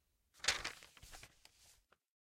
The sound of grabbing paper.